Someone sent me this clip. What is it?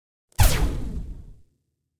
Recorded with Rode SE3
Rubbed fingers of bass guitar strings and sped up, also moved objects past the microphone quickly for a wooshing sound and pitch shifted heavily to create distortion.